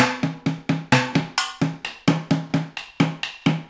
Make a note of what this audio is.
IMPROV PERCS 093 2 BARS 130 BPM
Sources were placed on the studio floor and played with two regular drumsticks. A central AKG C414 in omni config through NPNG preamp was the closest mic. Two Josephson C617s through Millennia Media preamps captured the room ambience. Sources included water bottles, large vacuum cleaner pipes, wood offcuts, food containers and various other objects which were never meant to be used like this. All sources were recorded into Pro Tools through Frontier Design Group converters and large amounts of Beat Detective were employed to make something decent out of our terrible playing. Final processing was carried out in Cool Edit Pro. Recorded by Brady Leduc and myself at Pulsworks Audio Arts.